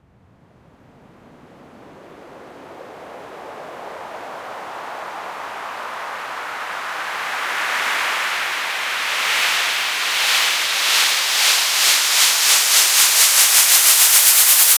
Lunar Uplifter FX 3
For house, electro, trance and many many more!
fx, lunar